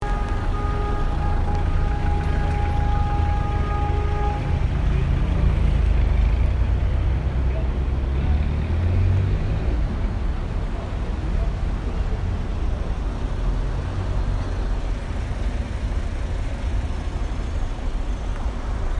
sound of the cars and of the horns.